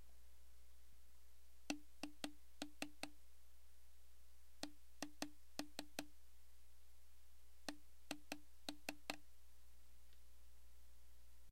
It is a recording made with the following instrument´s: 1. Tuperware plastic and spoon; 2. A Steren brand microphone, Steren Amateur model, was used; 3. The recording was done using the Pure Data ADC code, and the necessary Code to make a sampler with [tabwrite ~]; 4. The recording was made with the Audio interface provided by the Tb-03 monophonic synthesizer and with the Arduor 5 Digital Audio Station; the processing instruments used to perform equalization, mixing and mastering, the so-called “Eq Arduor Team” and “Compressor Arduor Team” virtual instruments.